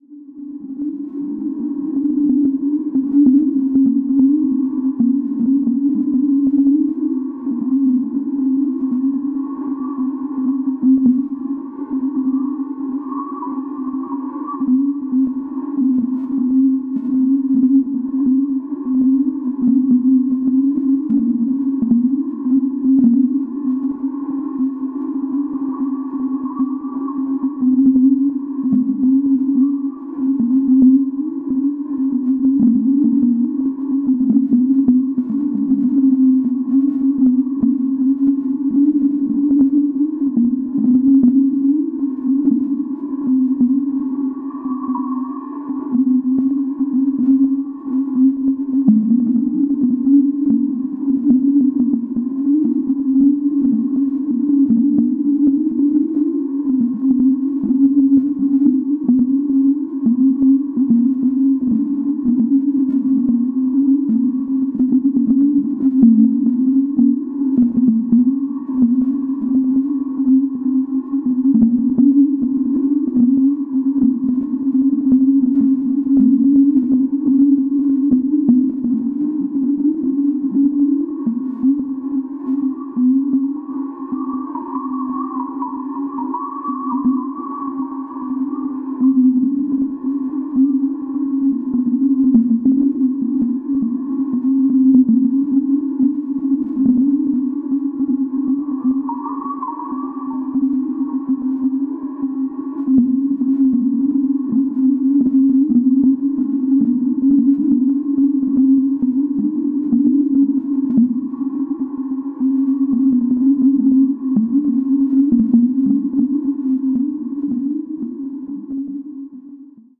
This sample is part of the "Space Machine" sample pack. 2 minutes of pure ambient deep space atmosphere. Spooky boring pulsating space atmosphere.
ambient, drone, experimental, soundscape, space